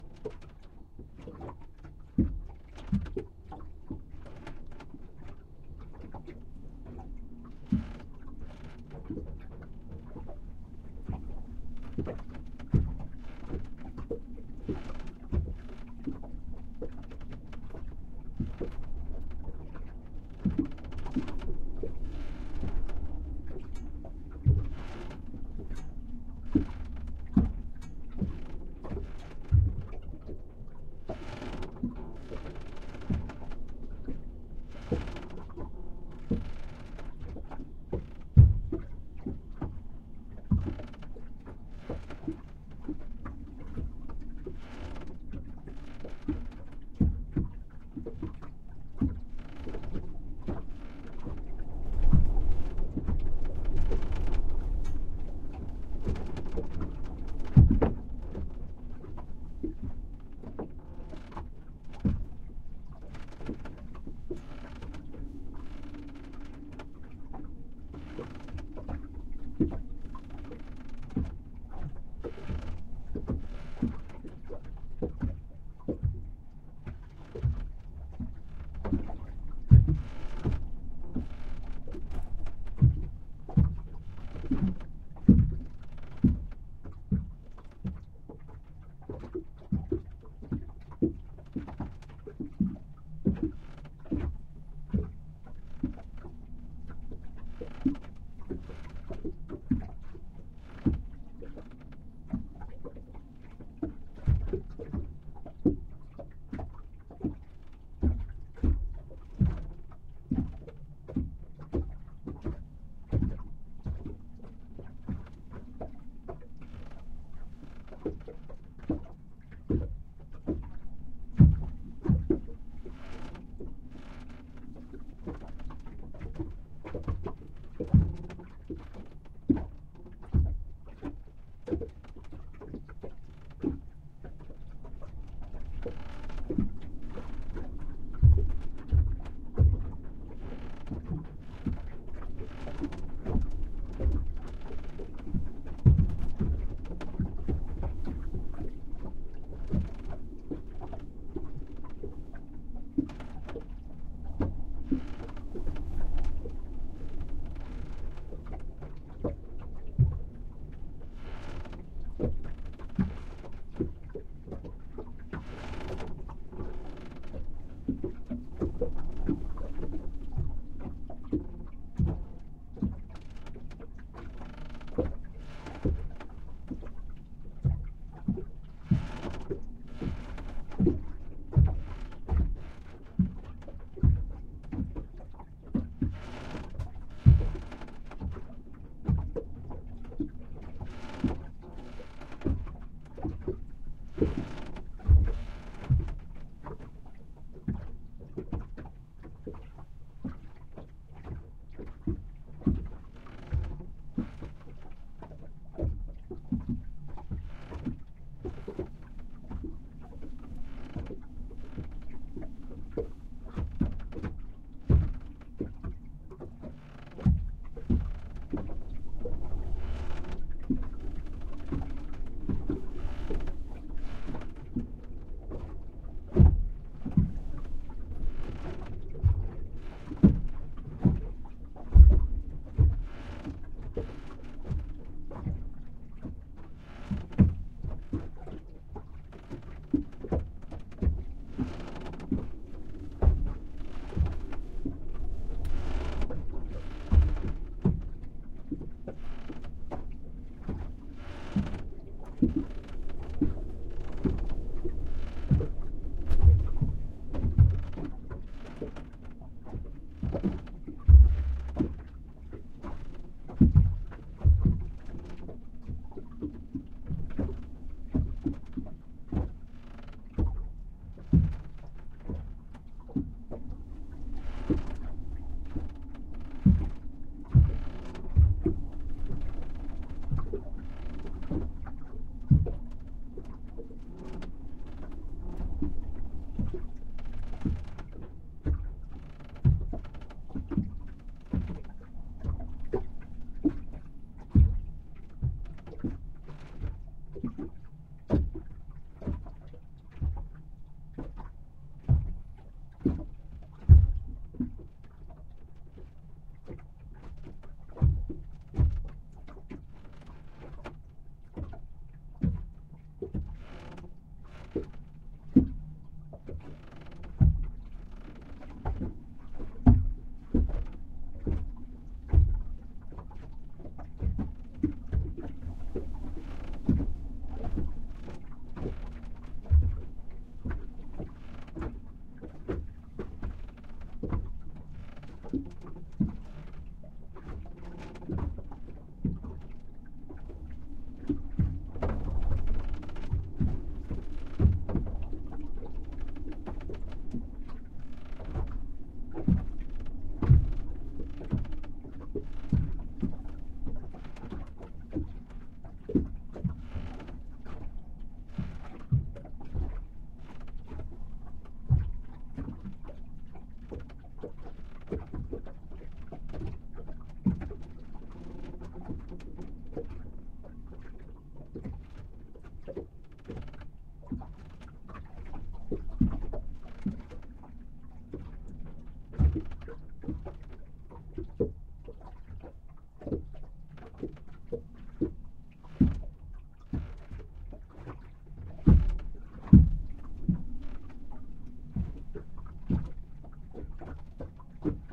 Moored sailboat interior in strong breeze
Recorded inside my moored sailboat on a windy morning. Straining ropes, choppy waves, complaining boat and wind in the rigging. Recorded with a Sony PCM-M10.